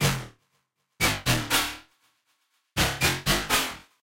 MOV.beat 7
Computer beat Logic
beat, computer, noise, 120-bpm, processed, electronic